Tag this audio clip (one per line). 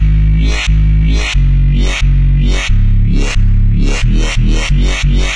179BPM
Bass
Beat
DnB
Dream
Drum
DrumAndBass
DrumNBass
Drums
dvizion
Fast
Heavy
Lead
Loop
Melodic
Pad
Rythem
Synth
Vocal
Vocals